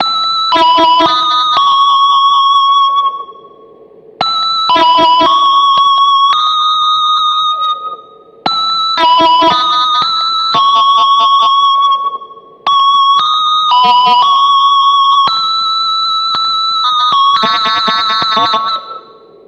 melody dist guitarish 114bpm
synth melody through a guitar gt6 multi fx